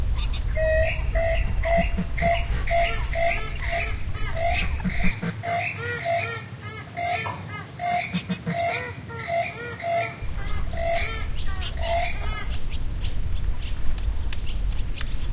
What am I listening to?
20100301.weird.call
a weird call from a marsh bird (Common Coot, I guess). Shure WL183 capsules inside DIY windscreens, Fel preamp, and Olympus LS10 recorder. Normalized and filtered below 100 kHz
water, south-spain, donana, ambiance, field-recording, birds, nature, marshes, coot